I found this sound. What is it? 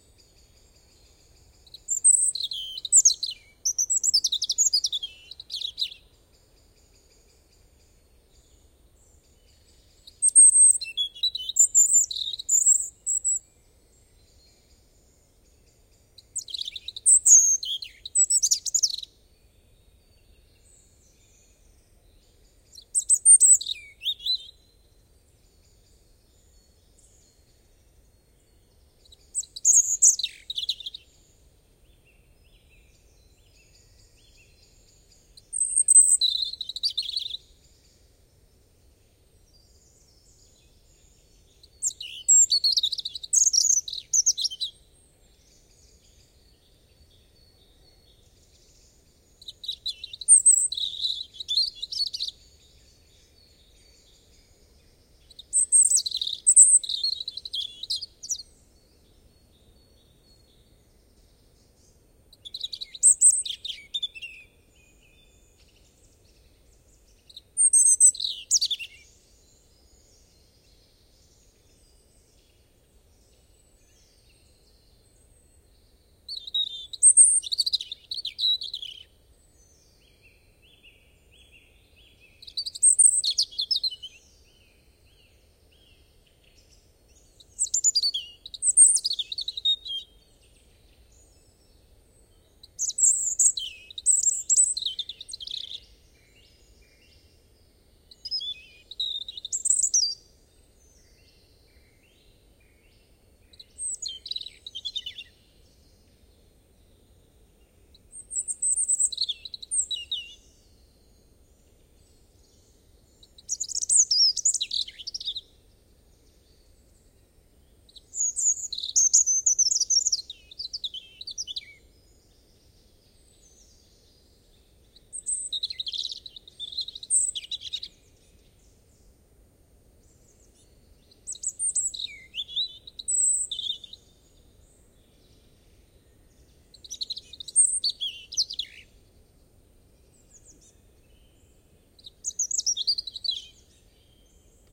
Vivanco EM35, preamp into Marantz PMD671.
rodhake, cervenka-obecna, petirrojo, erithacus-rubecula, forest, pettirosso, bird, crvendac, rodhals, rudzik, rotkehlchen, slavik-cervienka, roodborst, punarinta, pisco-de-peito-ruivo, nature, rodstrupe, rougegorge, spring, vorosbegy, field-recording, robin, birdsong